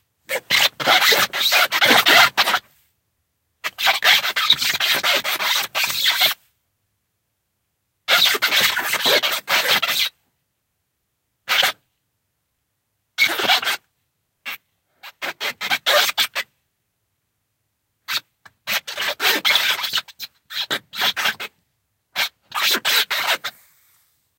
Sat in the back seat of a SUV with rubber floor mats when it had been raining. This tremendously annoying squeak happened, and so when we got to where we were going I recorded several on my Samsung phone.
floormat, noise, rubber, squeak